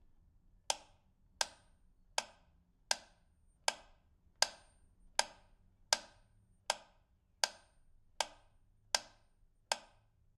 Metronome, even
Metronome, 13 beats, unknown bpm.
13, beats, metronome